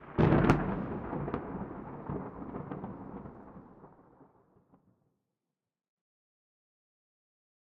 balfron thunder G
Field-recording Thunder London England.
21st floor of balfron tower easter 2011